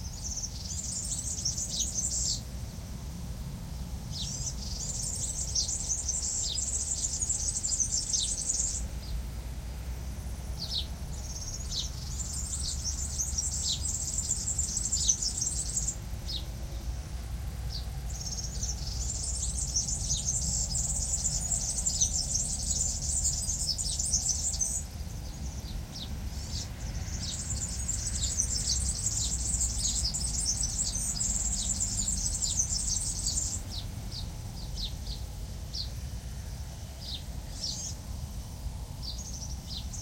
European Goldfinch bird
Recorded with Sound Devices 302 + 2x Primo EM172 Omnidirectional mics. This recording is perfectly looped
bird, birdsong, field-recording, nature